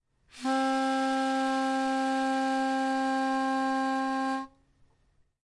Guitar Pitch Pipe, C3
Raw audio of a single note from a guitar pitch pipe. Some of the notes have been re-pitched in order to complete a full 2 octaves of samples.
An example of how you might credit is by putting this in the description/credits:
The sound was recorded using a "H1 Zoom V2 recorder" on 17th September 2016.
3, instrument, sampler, C, pipe, pitch, guitar